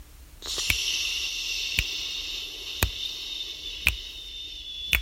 SEQUEIRA-Laura-2018-Shhhh
For this sound the goal was to make a sound pressure like a kettle. To make a questioning, and interested people who listen to this sound.